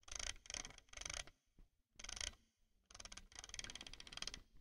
Mouse Roller.L
A mouse roller-wheel being turned
Computer-mouse, roller-wheel, sound-effect